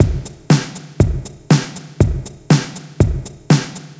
just a drum loop :) (created with FLstudio mobile)
beat, drum, drums, dubstep, loop, synth